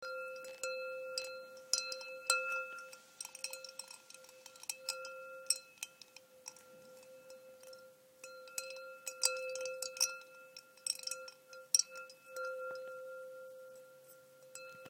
Ice cubes in a glass of white wine.
glass, drink, cube, cubes, ice